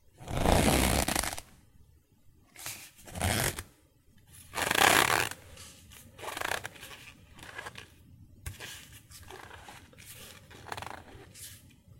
leather around handle
Sound of a leather handle